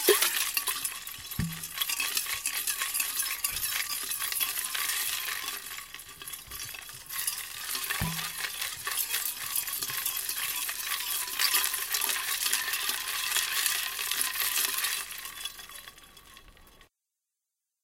The sound of an ice cream ball maker being shaken around.